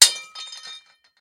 Glass broken with a steel hammer.
broken, glass, hammer